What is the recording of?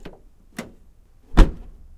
car trunk C
close, trunk, closing, door, opening, open, car